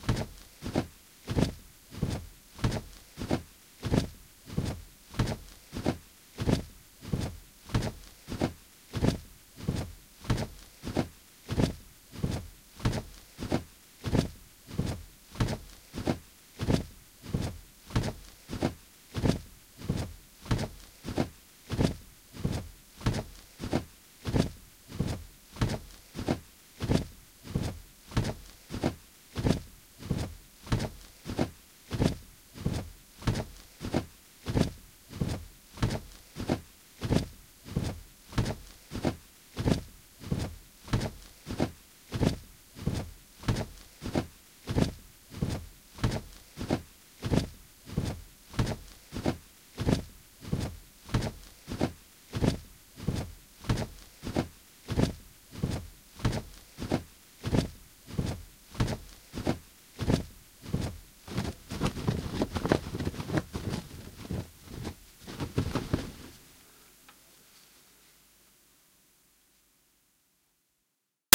dragon wings
I needed the sound of a flying horse (!) and created this noise using a loop from a recording of me flapping a bath towel slowly.
dragon, flapping, flight, dinosaur, harry-potter, flying, fantasy, wings